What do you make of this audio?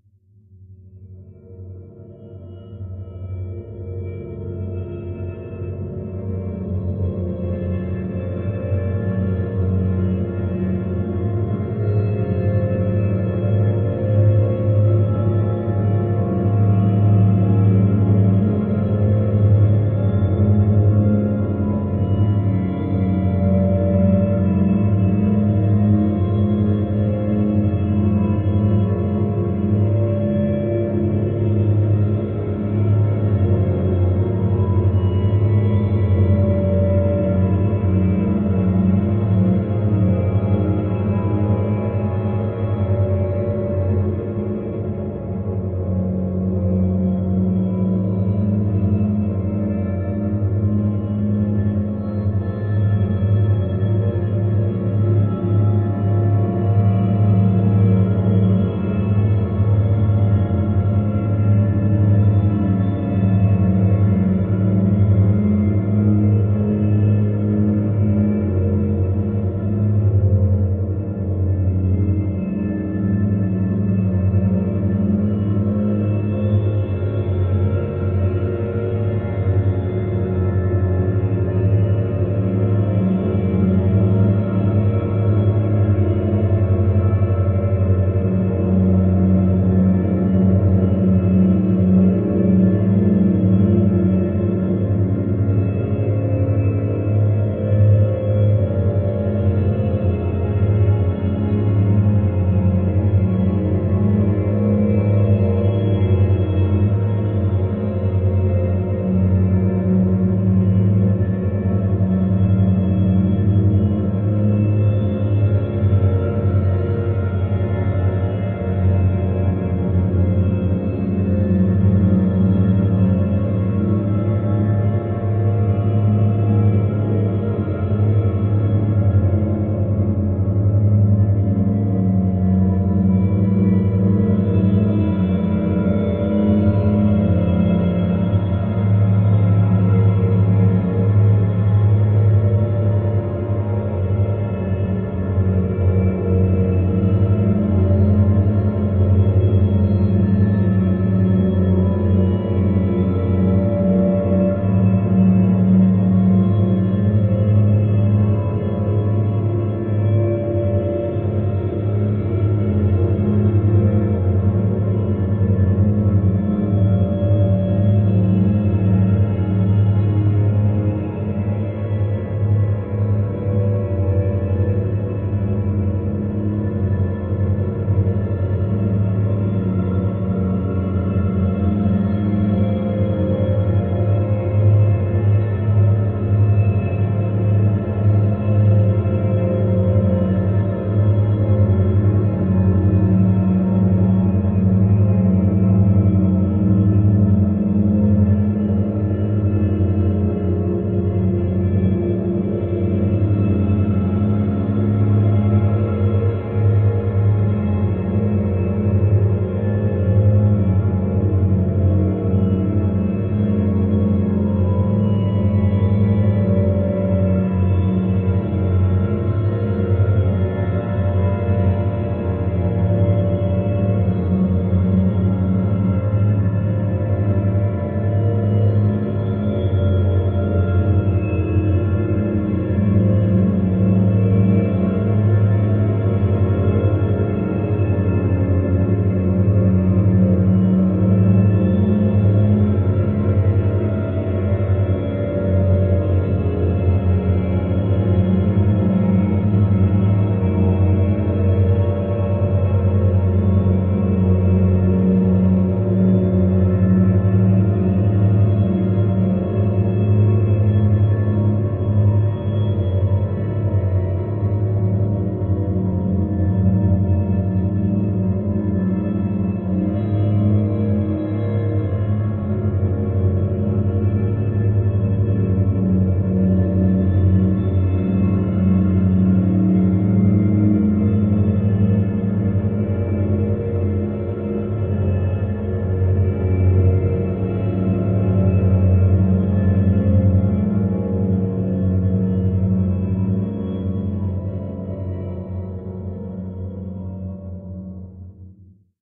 Ready to use horror/thriller/sci-fi background atmosphere with tension.
Created by convoluting choral vocal sample with granularized chamber music recording.